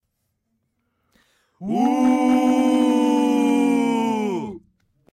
Som de vaias. Gravado em estúdio com microfone shotgun.
Gravado para a disciplina de Captação e Edição de Áudio do curso Rádio, TV e Internet, Universidade Anhembi Morumbi. São Paulo-SP. Brasil.
anhembi, Boo, vaia, vocal, voz